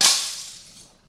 Glass smashed by dropping ~1m. Audio normalized, some noise removed.